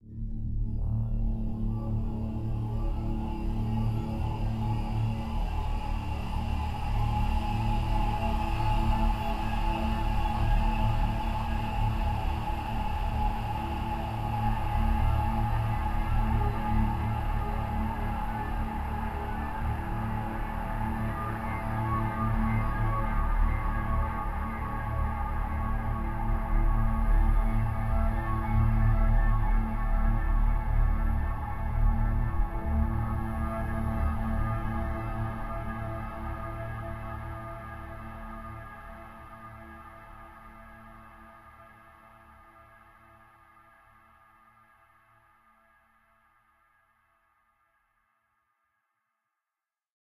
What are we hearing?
Originally made with an Alesis Ion Synthesizer and then processed through Absynth 5 with additional sound effects, mainly consisting of reverberation and granular synthesis.
*I'm open to take requests for certain sounds or music you may need for any project*.